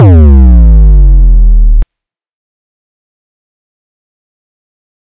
Made using LMMS.
High pitched bass which I have no idea why I made...